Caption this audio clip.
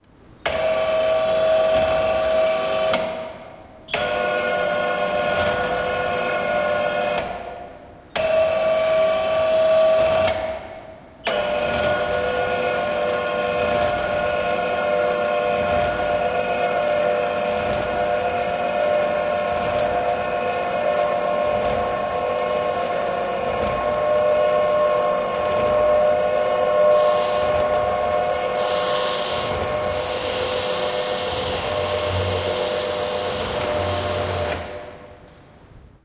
projector screen moving
An electronic projector-screen being expanded and contracted in a classroom at UPF Communication Campus in Barcelona.
automation, campus-upf, electronic, mechanical, projector, robot, screen, UPF-CS14